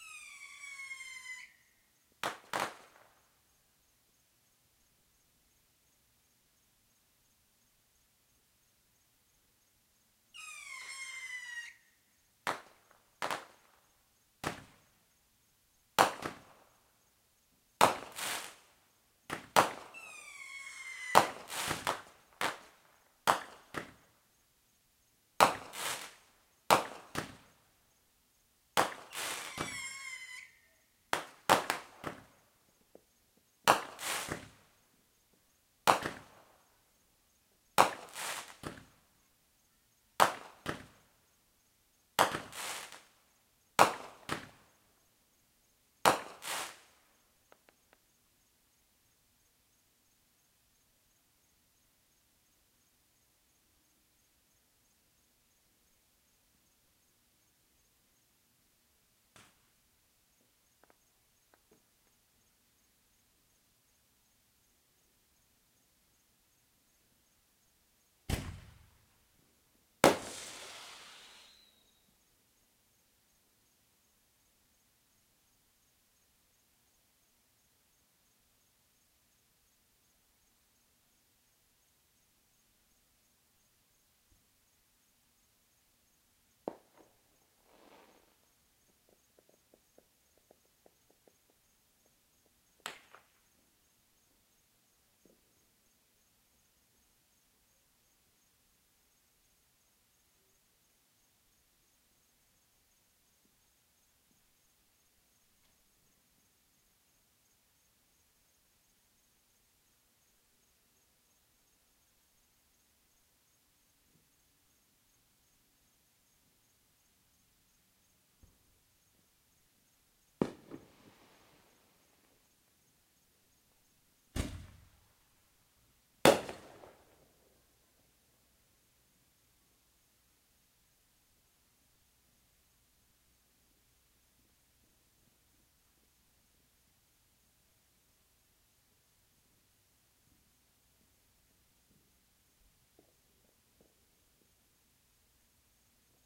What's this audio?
Fireworks directly overhead recorded with laptop and USB microphone.